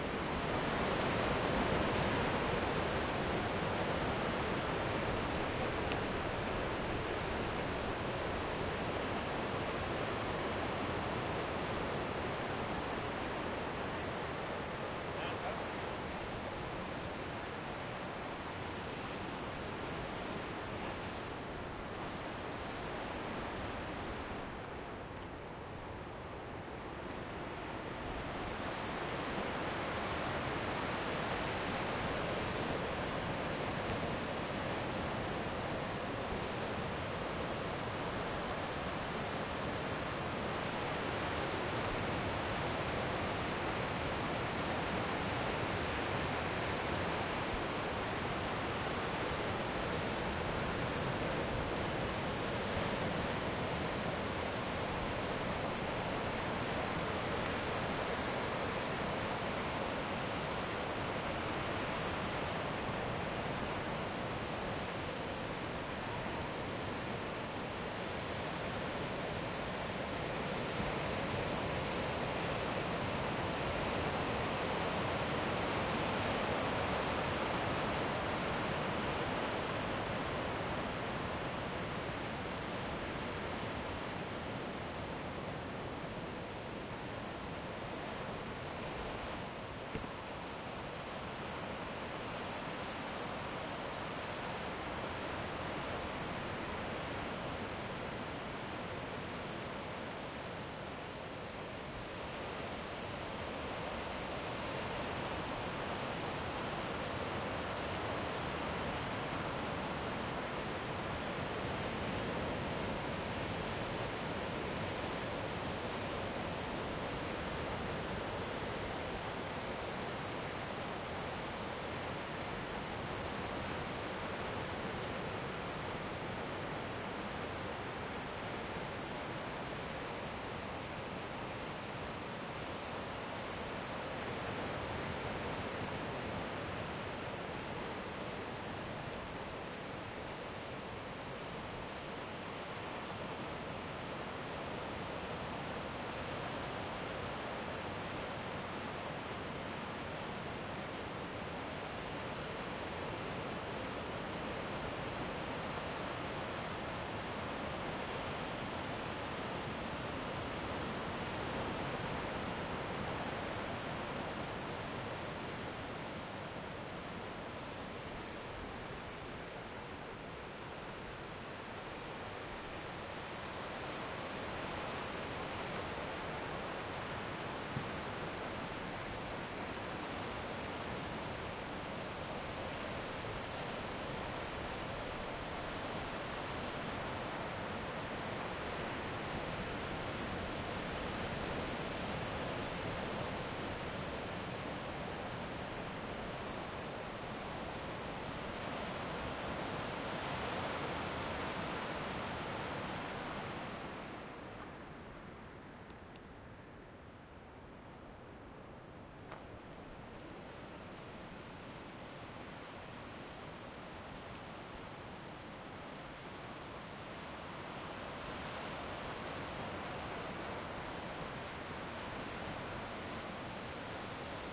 Mirador Zihuatanejo
beach, soundscape, water